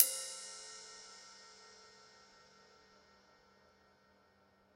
Drumkit using tight, hard plastic brushes.